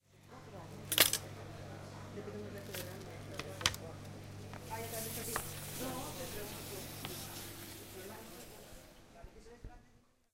moving self service plate
This sound was recorded in the UPF's bar. It was recorded using a Zoom H2 portable recorder, placing the recorder next to a guy who was preparing his lunch in the self service.
We can hear the guy moving his plate and also taking a fork and a knife for the meal.
bar, campus-upf, self-service, UPF-CS13